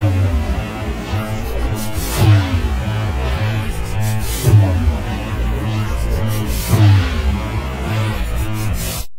Heavily processed (with pd - phase vocoder) rhythmical loop. Mono. :)
experimental, processed, rhythm